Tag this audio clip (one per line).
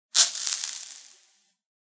agaxly
cave
crumble
dirt
dust
gravel
litter
scatter